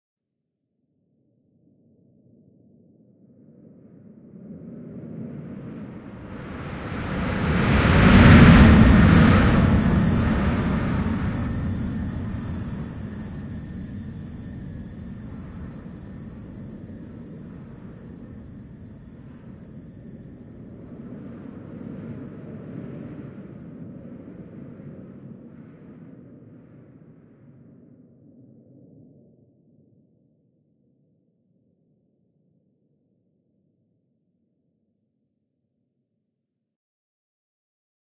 Air liner is taking off.Moves head-on.Louder(9lrs,mltprcssng)
The sound of an airliner flying over. Created artificially. It is based on the sound of the wind recorded in the mountains. The rest of the sounds used in the creation: the sound of a hiss of a gas burner, a whistling sound obtained by synthesis, the sound of a home vacuum cleaner (two versions of this sound with different pitch), low-frequency noise obtained by synthesis. The sound of the wind is processed differently for each of the three main layers. There is a distant layer with a tail, a near layer, an upper layer and a near layer with a low rumble (there is wind and low synth noise in it). Each of these layers goes through a flanger. And the last, tonal-noise layer consists of the sounds of a gas stove burner, a vacuum cleaner and a synthesizer whistle). All filtering, changes in pitch in the tonal-noise layer, level control by layers, are linked to one XY macro controller and their changes are programmed with different curves of rise, time of arrival and decay.
airplane, aviation, sfx, sound-design, fx, aeroplane, air-liner, sound, engine, flight, plane, flying, field-recording, noise, taking-off, cinematic, game, fly-by, artificial, aircraft, sounddesign, jet